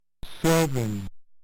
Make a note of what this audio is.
Seven - circuit bent from a child's teaching aid